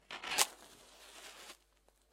Match strike 02
A match strike recorded with Oktava MK-319
fire, strike, match